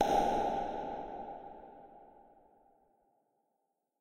forehand far
Synthetic tennis ball hit, forehand, coming from the opponent.